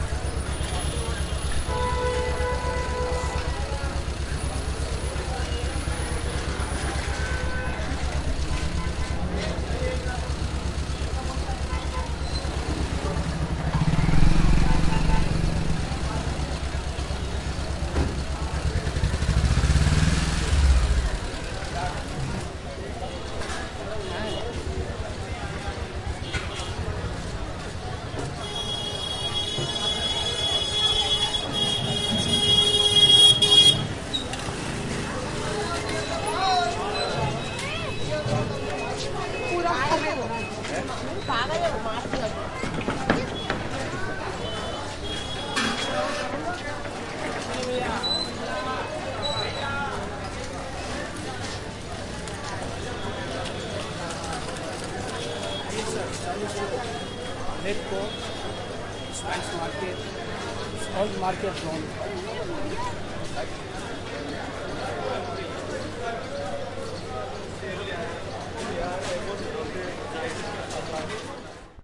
Old Delhi Street Ambience
When i was visiting the streets of Old Delhi i was able to make a few recordings in the busy and chaotic streets. You can hear bikes, moped and tuktuks passing by, while the salesmen are trying to sell their commodities.
people, street, delhi, h1, atmosphere, audiorecorder, ambience, noise, cars, ambiance, city, india, zoom, horn, honk, bike, traffic, olddelhi, busy, field-recording